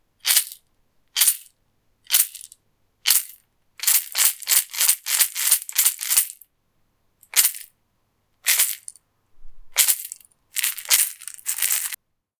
Shaker heavy
Some shakes of a handmade wooden rattle, heavy shake with low tones
hand, instrument, made, musical, natural, rattle, shaker, shaman, wooden